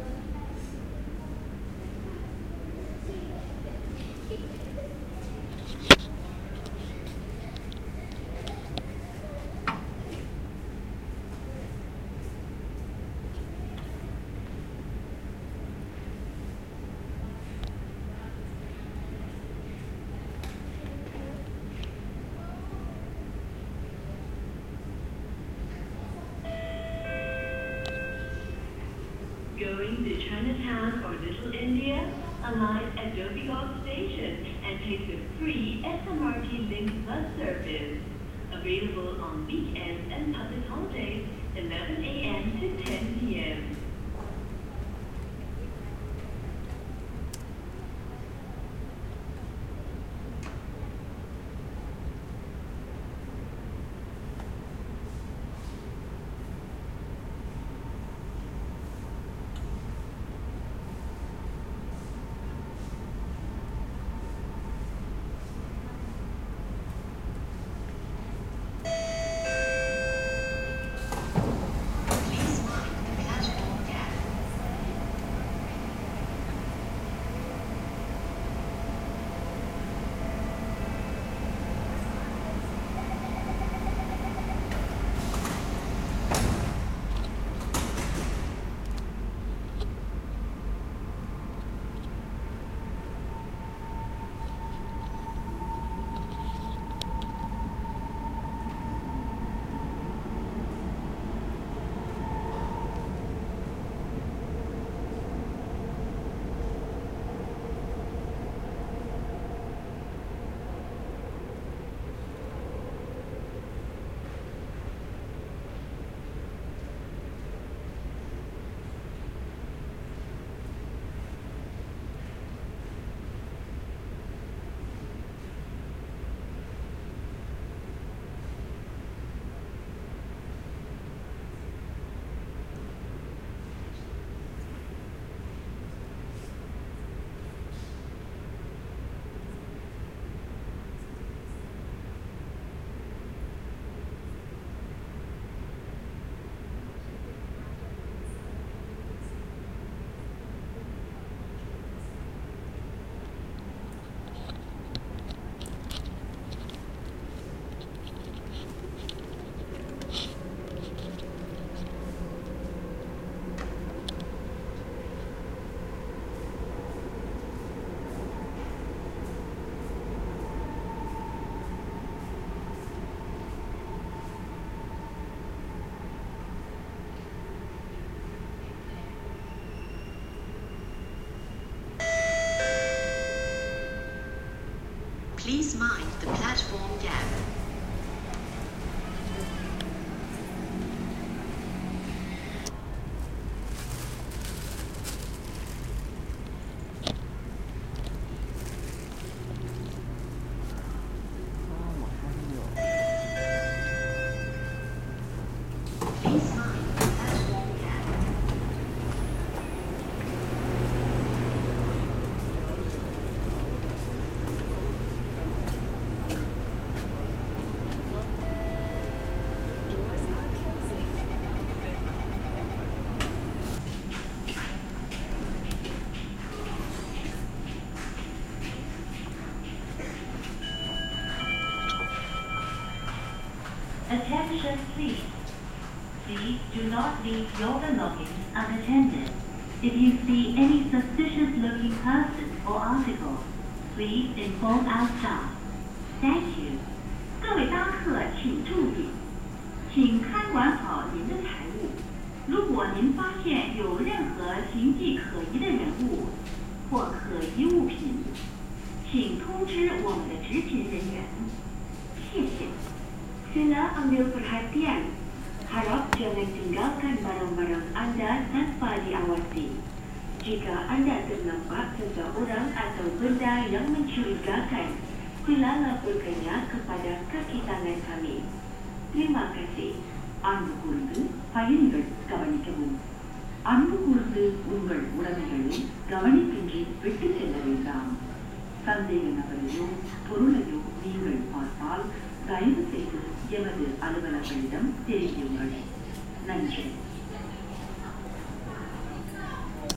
singapore-mrt3
Sounds taken from inside the Singapore MRT station
Ambient sound, train arriving, doors opening and closing, train departing.
Including the following announcements:
Going to china town or little india, catch the free SMRT link bus service.
Available on weekends and public holidays, 11am to 10pm
Please mind the platform gap
Passengers alighting
Attention please: Please do not leave your belongings unattended. If you see any suspicious looking persons or article, please inform our staff (in 4 languages: English, Mandarin, Malay and Tamil)
english, mrt, doors-closing, chime, indian, ding-dong, announcement, tamil, attention-please, bell, singapore, chinese, train, mandarin, mind-the-gap, malay